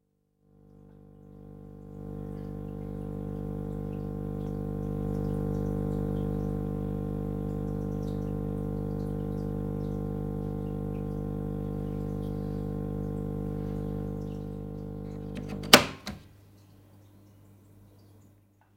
humming noise of a vintage Telefunken valve radio, with sound of switching off at the end.
antique, flickr, hum, telefunken, valve-radio